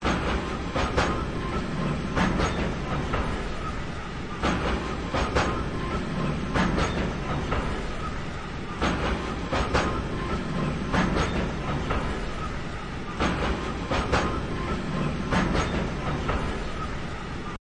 Train Track Joints Slow (Loop)
Before the train pass, i placed my camera on the tracks.
loop, tracks, train